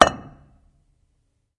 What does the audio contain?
stone on stone impact11
stone falls / beaten on stone
strike, stone, impact, concrete